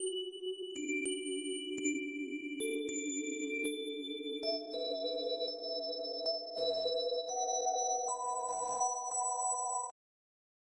reversed melody
bells i made for jelly makes me happy
bells, bit, bit-reduction, evolving, grains, granular, melodic, morphing, reduction